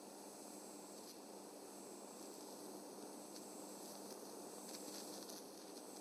Electric welding with tig - Run
Wameta TIG 1600 mid cycle.